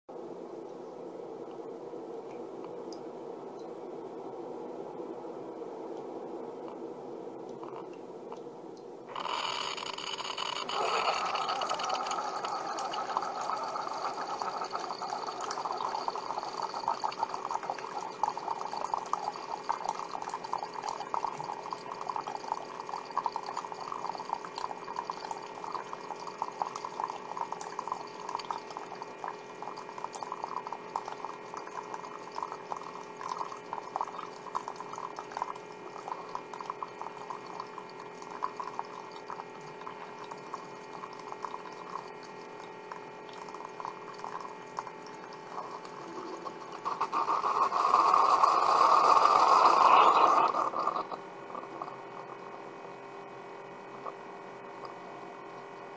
Keurig kcup brewing
This is the unmistakable kcup brewing sound edited to only the recognizable portion. Consider pairing with the load sound. Both were recorded in my kitchen from my own brewer.